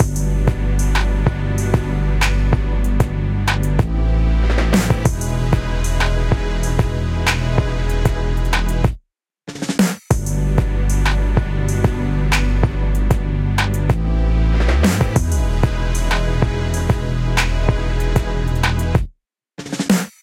CHORDABEAT 95BPM
I made this "latino-tech" beat with no specific purpose. Maybe you guys can find use for it!
atmospheric, beat, raggaeton, synthscape